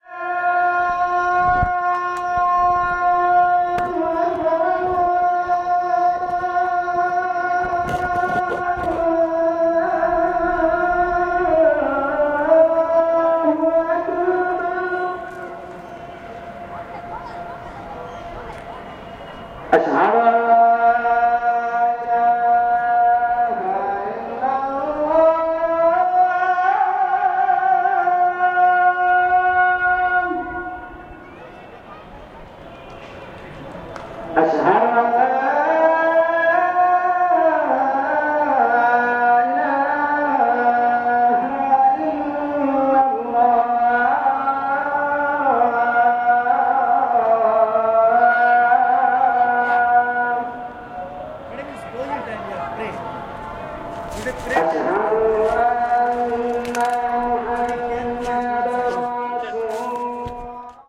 Call to Prayer, Old Dehli
The call to evening prayer as heard at the exquisite Mughal 17th century Jama Masjid mosque in the heart of old Delhi, India.
mini-disc
Adhan, Azan, call-to-prayer, Delhi, field-recording, holy, India, Islam, Jama, Masjid, Mecca, mosque, muezzin, Mughal, Muslim, old, pray, prayer, religion, salah